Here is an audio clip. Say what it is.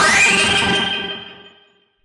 Fake C64 sound effect featuring the kind of arpegiated sound that was characteristic to the C64 music and some sound effects.
This is a fake, produced by a completely unrelated method (see below) - no C64 or other vintage computer was used, no software designed to emulate the C64 sound was used. It just happened by chance.
This is how this sound was created.
The input from a cheap webmic is put through a gate and then reverb before being fed into SlickSlack (an audio triggered synth by RunBeerRun), and then subject to Live's own bit and samplerate reduction effect and from there fed to DtBlkFx and delay.
At this point the signal is split and is sent both to the sound output and also fed back onto SlickSlack.